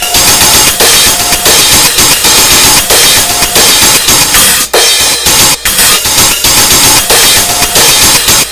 Yea A Roland 505 ......
Good Intro Beats or Pitch Them Down.... Whatever....